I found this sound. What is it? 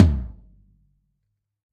Floor Tom - sample from handmade Highwood Kit, recorded with a Sennheiser MD421
14-md421-rock-acoustic-kit, drum, floor, highwoord, tom